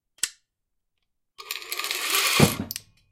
a stereo recording of a hand winch released. The weight drops down about 1.5m. Zoom H2 front on-board mics.